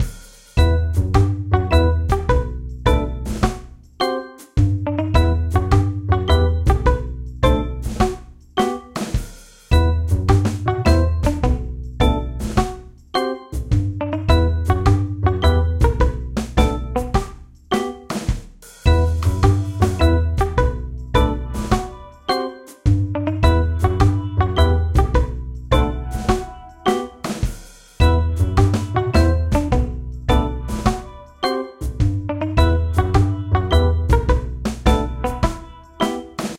Relaxed walk along the beach.
The hammock in the shade is already in sight.
You can do whatever you want with this snippet.
Although I'm always interested in hearing new projects using this sample!